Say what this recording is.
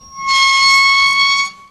playing with metal fountain
juganndo con una fuente